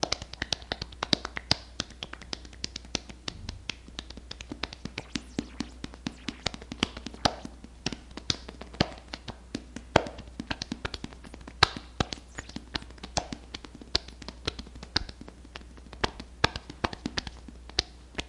ritmos palmas sobre cuerpo
hands, rhythmic, body, palms, percussive